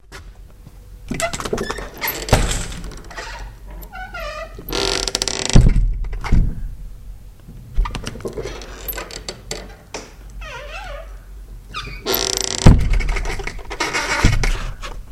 kitchen door
this is the sound of the door of our kitchen :)
door, dragnoise, machine, noise